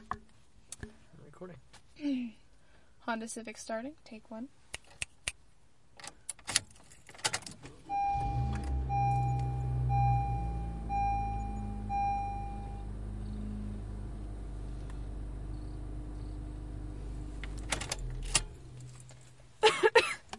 Stereo, H4N
In a small sedan, cloth seats, in a parking lot by the highway, Two people inside. Engine starting and car beeping